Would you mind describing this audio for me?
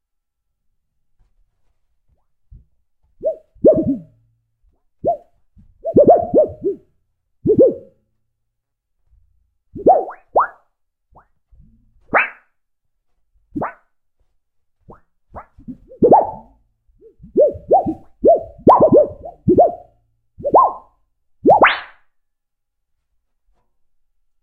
metal-bend-mix1
Me playing with a thin, 50 CM * 15 CM metal sheet. I tried bending it at different speeds to get different sounds from it.
metal, sheet